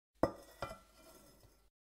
FOODKware Scraping A Heavy Bowl Against A Counter 01 JOSH OWI 3RD YEAR SFX PACK Scarlett 18i20, Samson C01
Scraping a ceramic bowl along a granite counter top
OWI, baking, bowl, ceramic, cooking, granite, heavy, kitchen, mixing-bowl, scrape